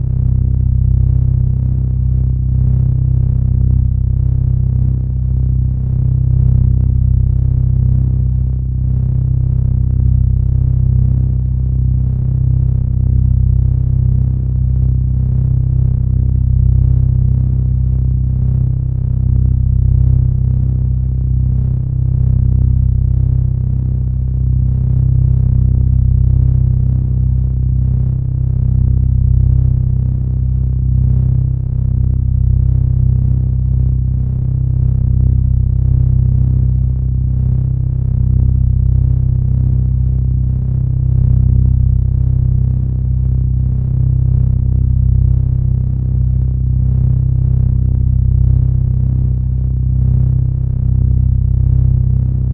Shield energy [loop] 01
Created using an A-100 analogue modular synthesizer.
Recorded and edited in Cubase 6.5.
It's always nice to hear what projects you use these sounds for.